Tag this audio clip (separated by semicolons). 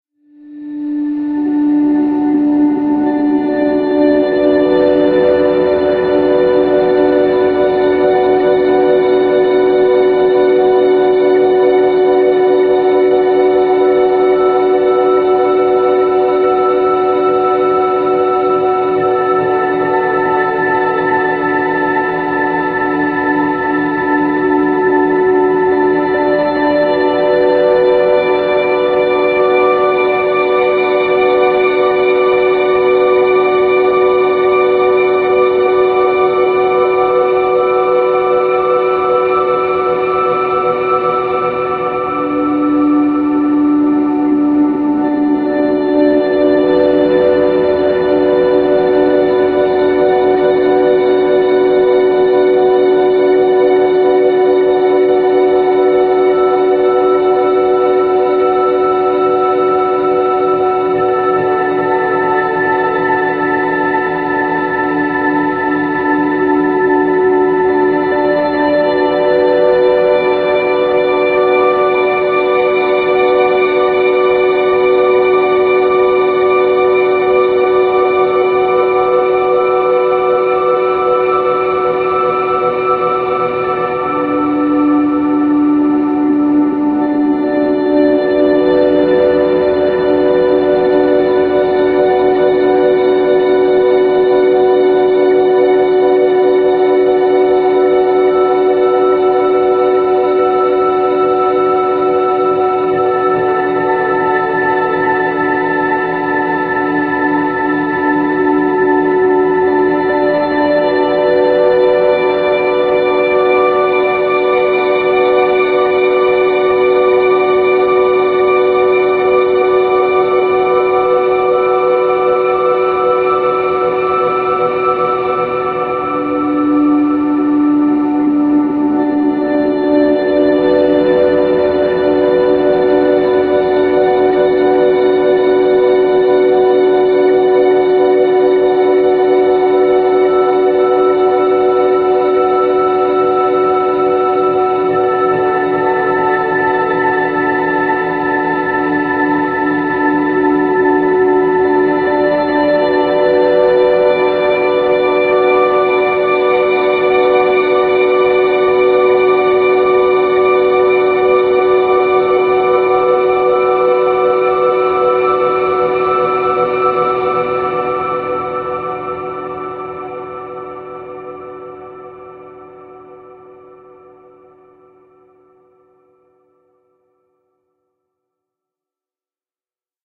morning; open; sea